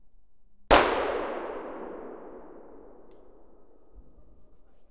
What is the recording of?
In the forest real shooting with a shotgun caliber 20.
The microphones are placed about 15 meter away because of the sound level they can handle.
Microphones Line audio two CM3 and one OM1(for ambience)
Audacity + Wavelab